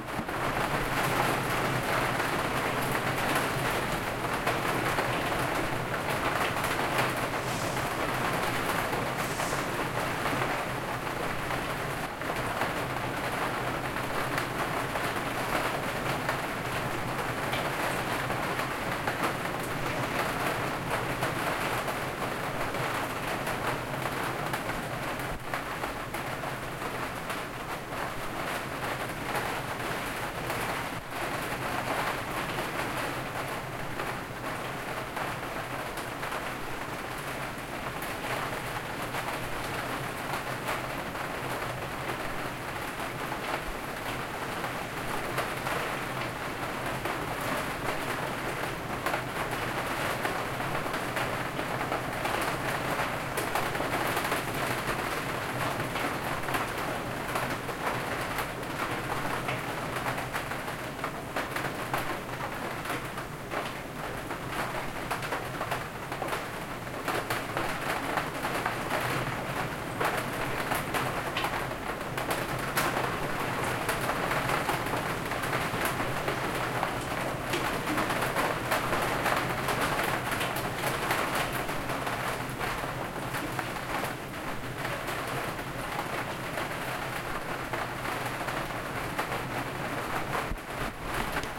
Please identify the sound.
130915 - Interior-Hard Rain with 2 Skylights
Interior Hard Rain with 2 Skylights
Interior-roomtone, roomtone, RAIN, Skylights